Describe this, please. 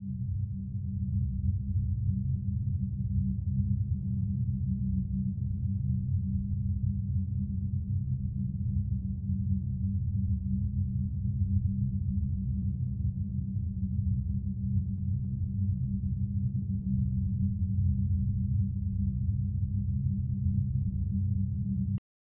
Brown Noise Ambience
A filtered brown noise ambiance.
brown-noise, ambience